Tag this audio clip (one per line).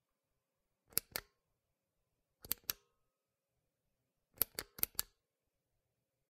push
light
click
press
mechanical
button
short
lamp
switch
off